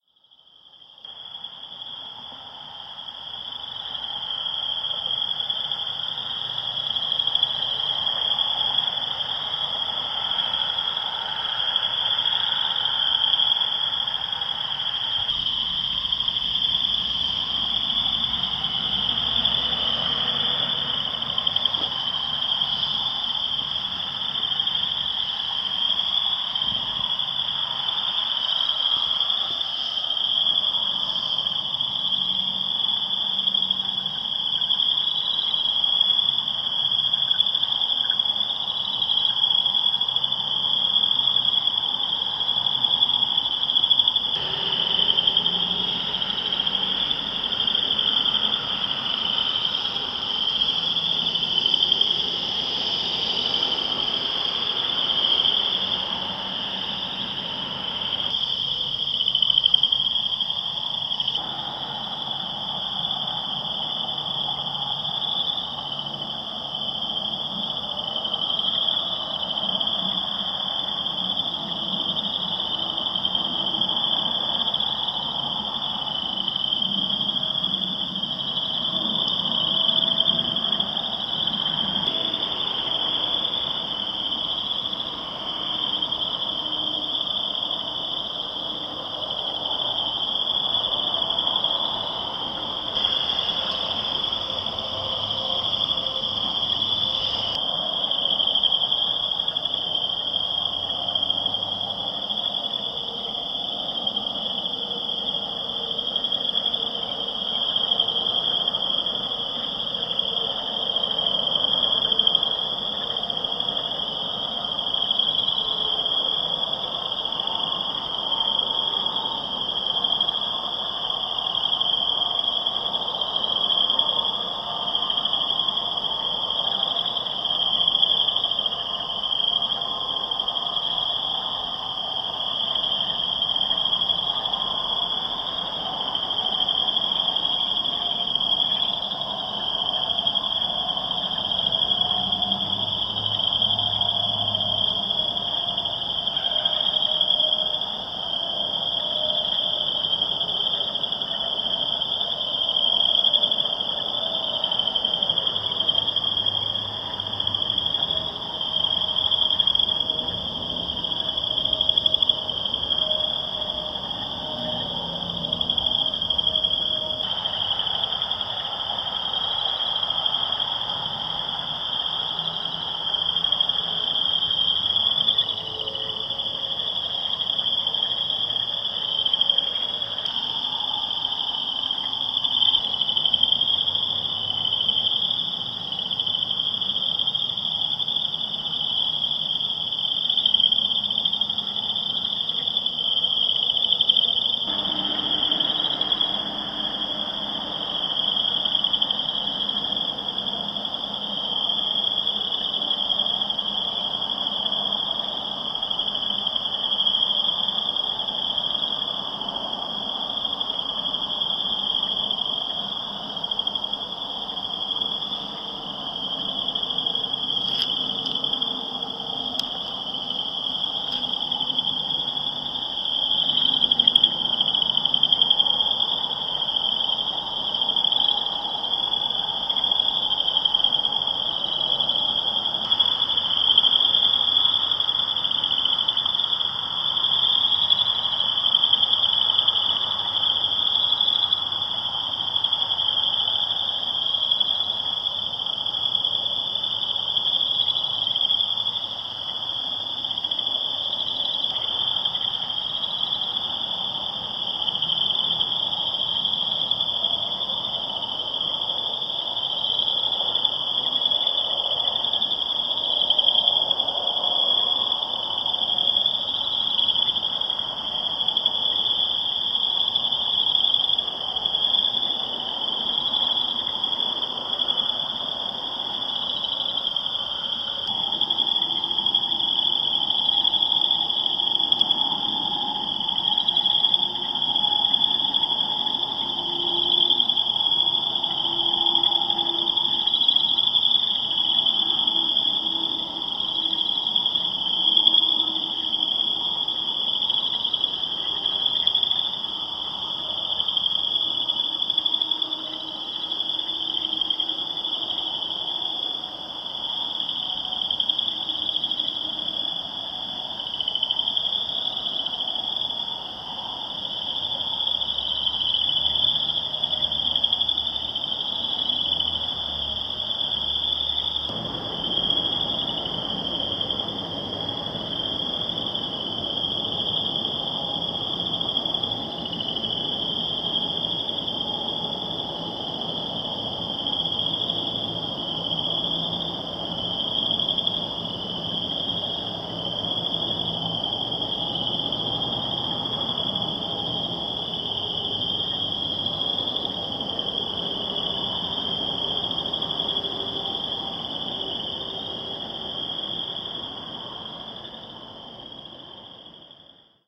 Boreal Chorus Frog
I recorded this from my bedroom window at night. There were cars going by on the road so I tried to filter those sounds out. It's pretty hard to pull this off.
Hope it sounds good!
Sony Cybershot Camera
Audacity (editing)
Frogs, summer, ambiance, animals, nature, field-recording, night